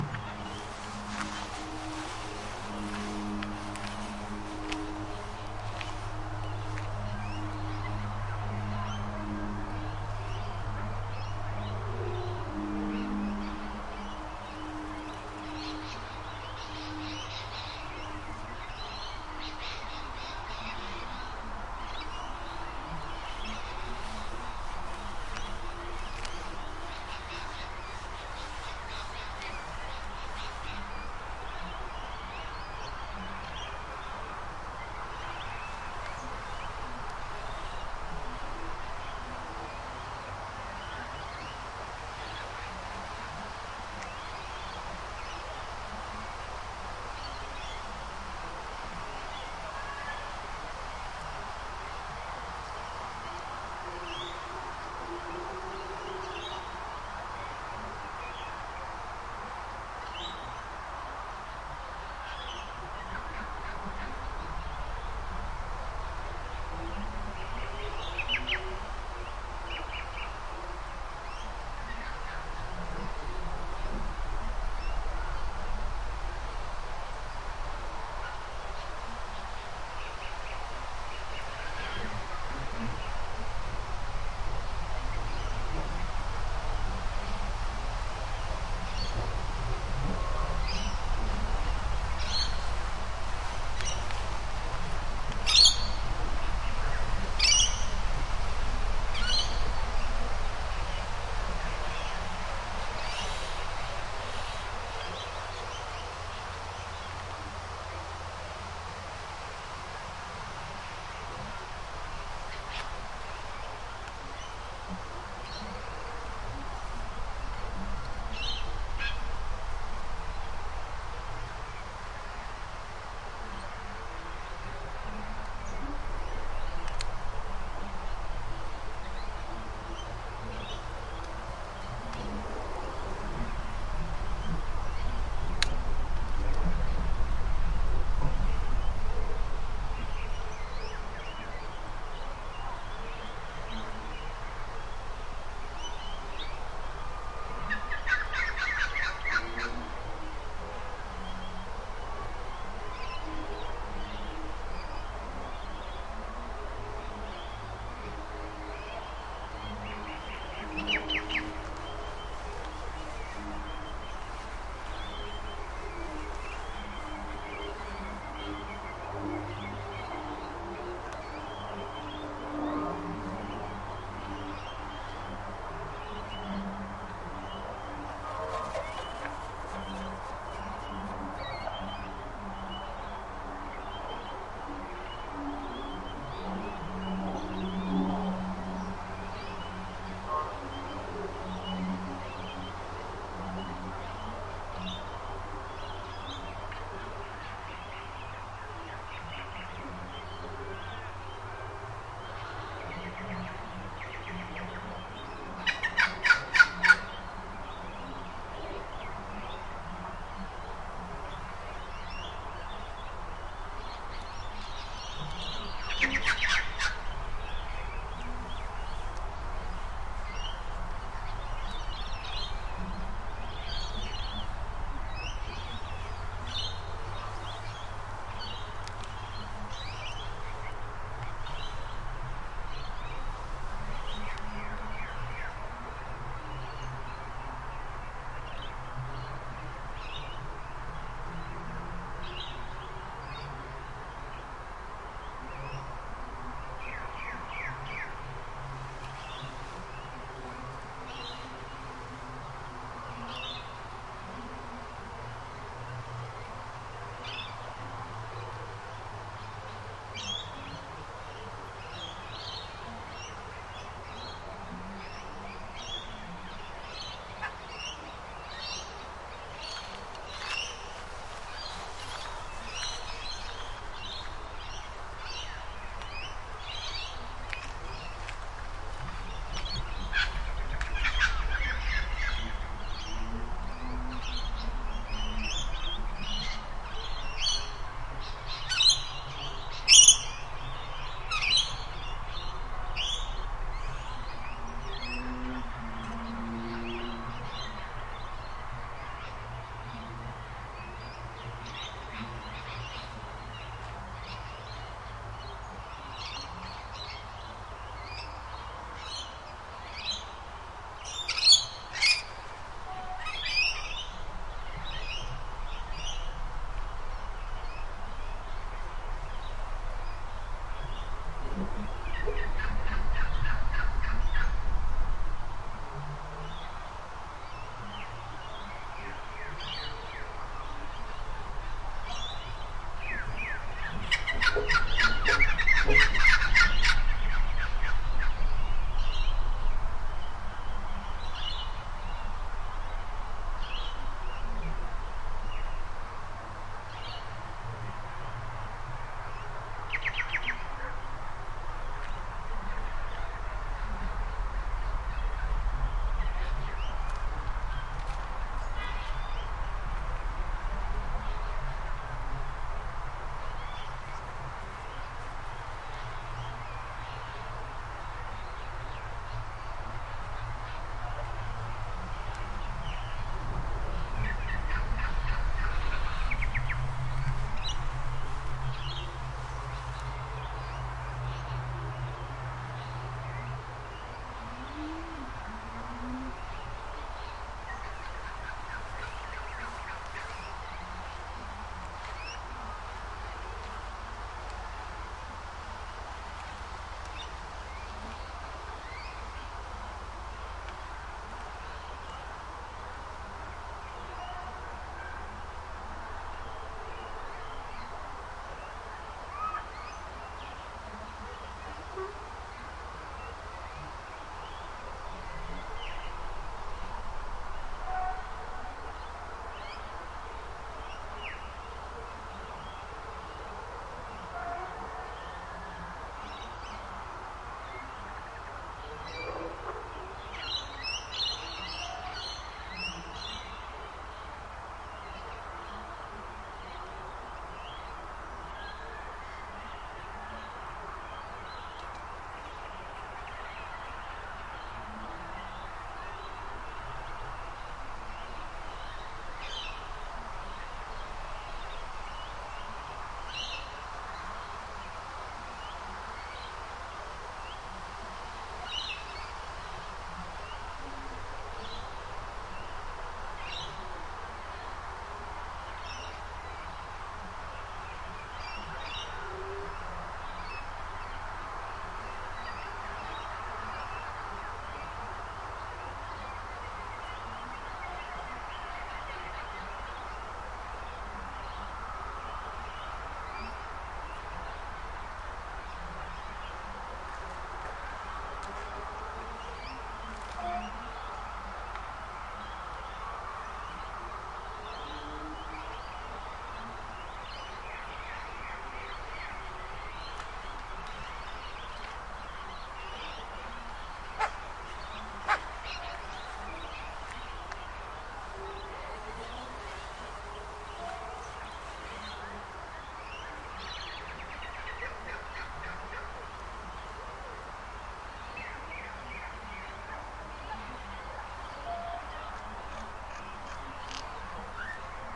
Melbourne General Cemetery, a weekday afternoon
A stereo recording of a weekday afternoon at Melbourne General Cemetery (Victoria, Australia), in the suburb of Carlton North or Princes Hill. There are some birds, a plane flying overhead. It was recorded using a Sony PCM-D50's built-in stereo microphones and has had light noise reduction applied (De-Wind, RX8).
This recording was taken in August 2021 on unceded Wurundjeri land, which belongs to the Woi Wurrung people of the Eastern Kulin nations. I acknowledge their sovereignty and pay my respects to their elders and all First Nations people.
wurundjeri, narrm, atmos, woi-wurrung, naarm, australia, ambiance, city, field-recording, ambience, melbourne, carlton, cemetery